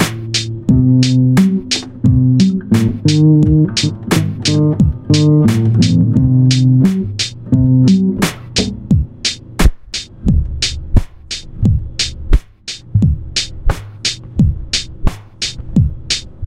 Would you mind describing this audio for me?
i'm a very bad guitar player, but i have a great limiter in pure data.